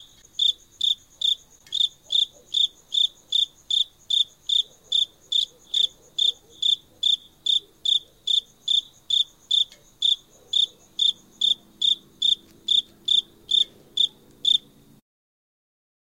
Crickets under the night sky Recorded on a Tascam dr-40 Recorder.
OWI, Insects, Crickets